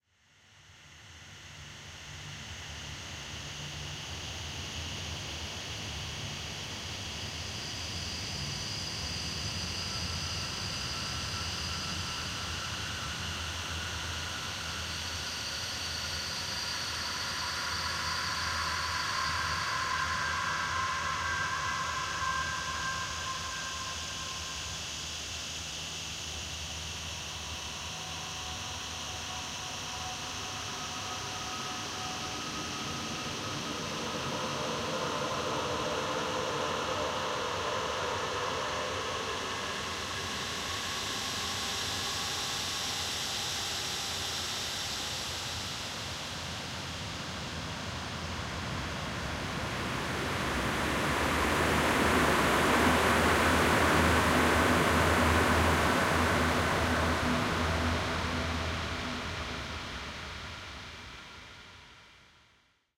Sound created from a field recording of my suburb.
air, atmosphere, emotion, gas, hiss, space, synthetic-atmospheres